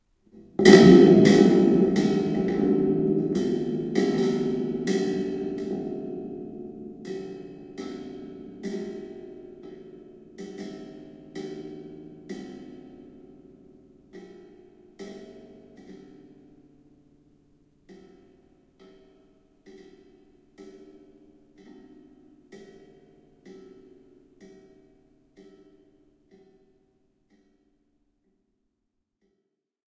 Spring Drum (2)
spring striking metal
drumhead, spring, spring-drum